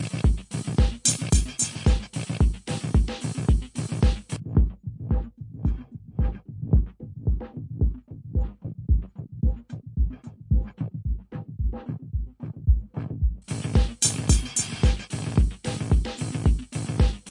Only the built in filter was used.This sample is a rhythmic loop running through the Trance Gate pattern gate and built in filter with LFO.